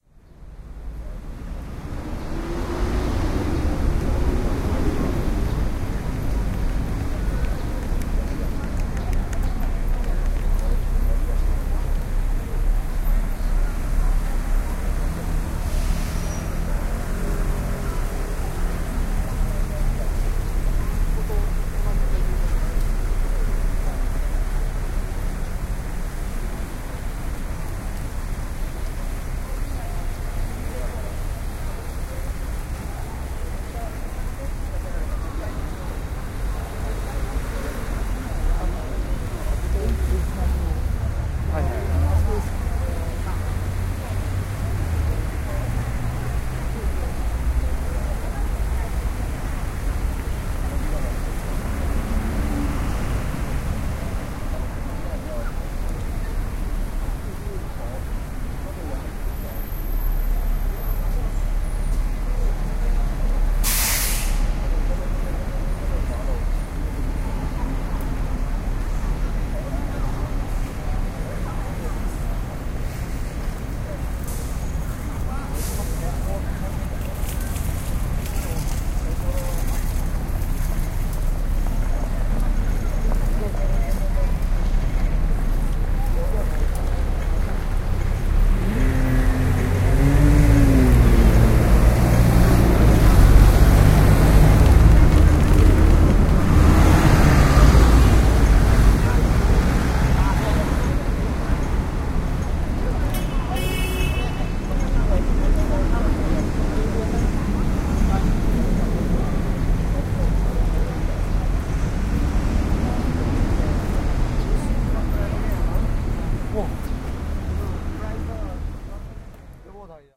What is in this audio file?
Senado Square in Macao
Field Recording for the Digital Audio Recording and Production Systems class at the University of Saint Joseph - Macao, China.
The Students conducting the recording session were: Nadia Loletta Lei, Gillian Chen, Alex Lee, Marco O, Felix Lee, Joana Leong